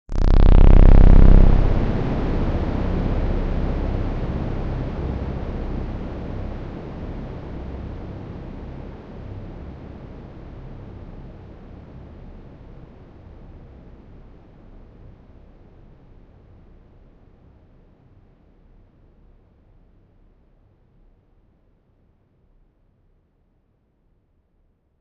A single deep splattering synth hit followed by a long reverb tail